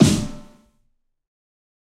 Fat Snare EASY 037
This is The Fat Snare of God expanded, improved, and played with rubber sticks. there are more softer hits, for a better feeling at fills.
drum, fat, god, kit, realistic, rubber, snare, sticks